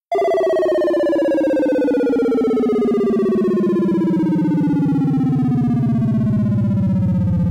High Score Fill - Descending Faster
Use it to accompany graphics such as a percentage sign or health meter that is draining. This is the fast version. Made with Reason.
score, bleep, descending, beep, computer, faster, high, high-score, bloop